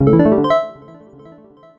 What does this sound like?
I made these sounds in the freeware midi composing studio nanostudio you should try nanostudio and i used ocenaudio for additional editing also freeware
application, bleep, blip, bootup, click, clicks, desktop, effect, event, game, intro, intros, sfx, sound, startup